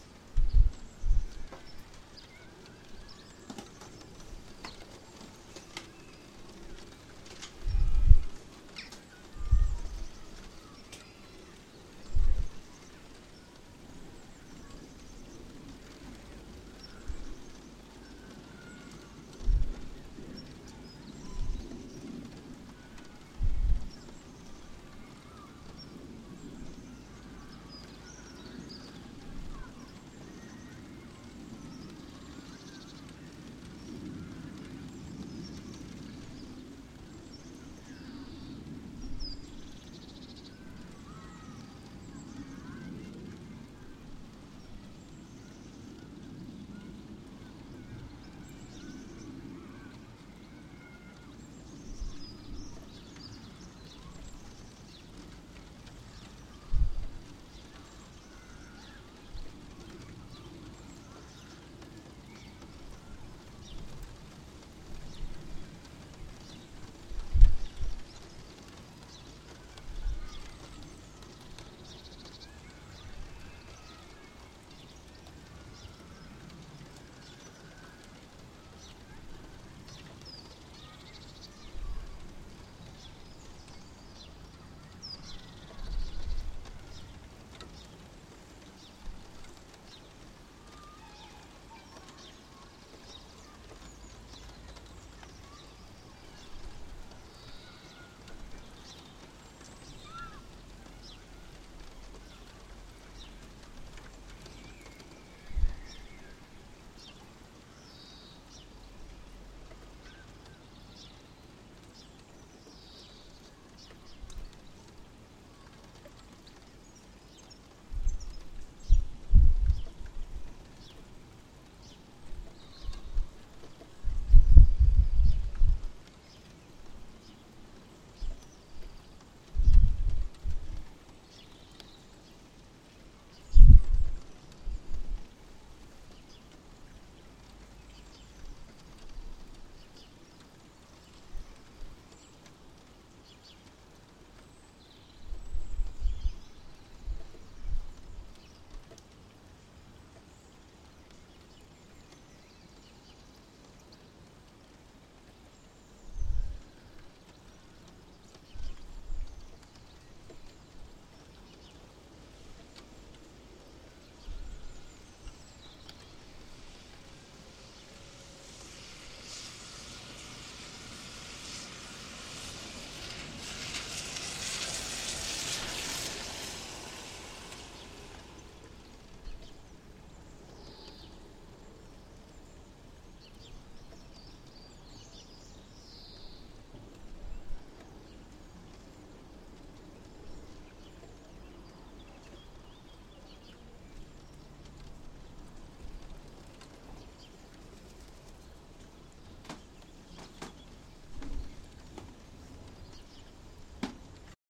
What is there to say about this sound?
This recording came from me sticking a AKG C1000 on the end of my window and just leaving it for a few minutes. Some interesting sounds recorded methinks.
What can be heard:
Birdsongs
Vehicles going by
Kids at the local school
Rain on the window
There are a few peaks from wind noise, there was a foam muffler on the mic but its not really designed to stop wind noise...
You can also hear me moving around at the start and end of the recording, i tried to be quiet but ,having a wooden floor, it was a bit difficult...
Recorded using a Phonic FireWire mixer and Logic 9
Do with it what you will, just reference me if you put it in anything commercial please!